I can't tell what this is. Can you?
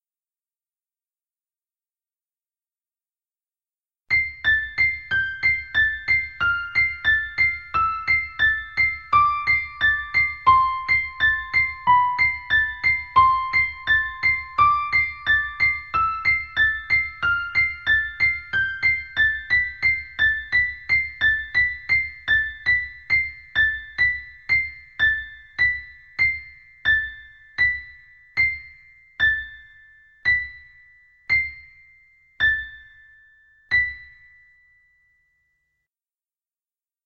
A Music Box song created for the Music Stock of CANES Produções.
It's easy-to-edit, beautiful and simple melody.